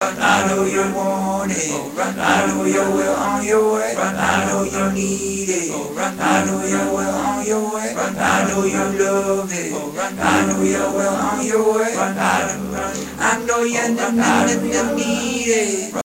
GOLDEN GRAIN Vocals
A collection of samples/loops intended for personal and commercial music production. For use
All compositions where written and performed by
Chris S. Bacon on Home Sick Recordings. Take things, shake things, make things.
Folk, rock, percussion, looping, guitar, drums, acoustic-guitar, drum-beat, beat, vocal-loops, acapella, original-music, indie, loop, synth, Indie-folk, free, samples, melody, loops, bass, voice, whistle, piano, harmony, sounds